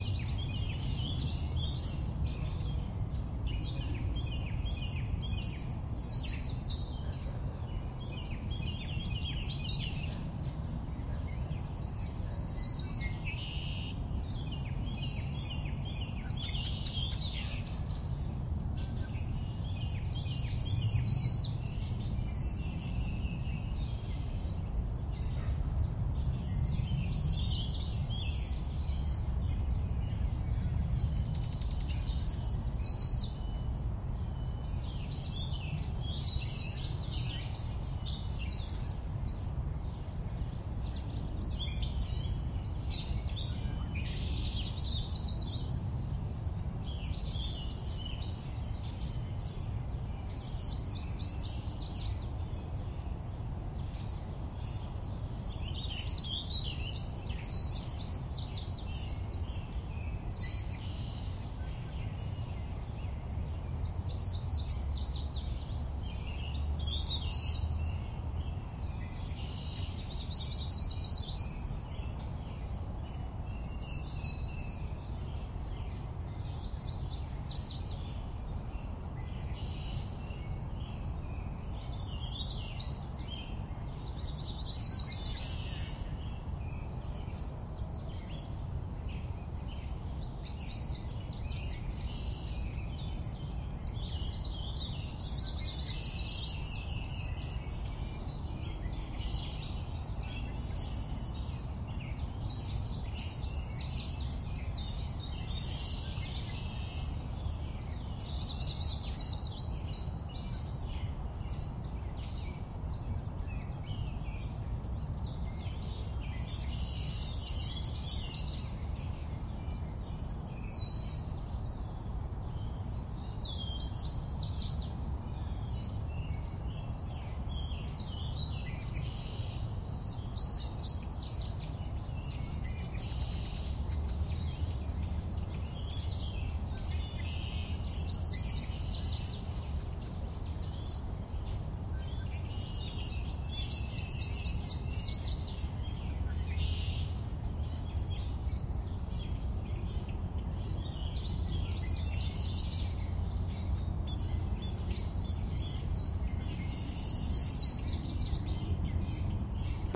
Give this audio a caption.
Spring Birds 2019 (nearly raw audio)
Similar to my popular 2016 recording. Many birds are audible, as well as distant dogs. For a filtered loop suitable for videos or games, check out the processed version of this sound, with the background noise greatly reduced. Recorded during the 2019 World Series of Birding competition in NJ.
Two EM172 mic capsules -> Zoom H1 Recorder
ambiance, ambience, ambient, bird, birds, birdsong, daytime, EM172, field-recording, forest, h1, nature, new-jersey, spring, zoom-h1